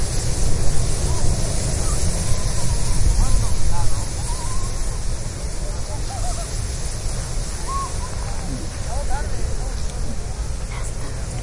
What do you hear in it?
The sound of wind, delta of Llobregat. Recorded with a Zoom H1 recorder.